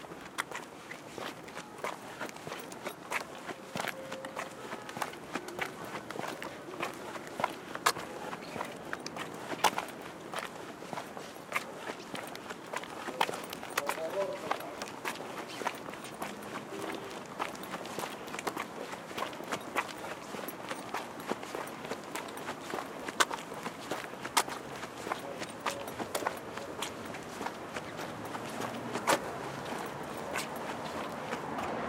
FX - pasos